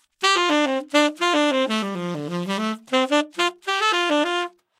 Sax-Ten-Phrase3
Part of Tenor sax solo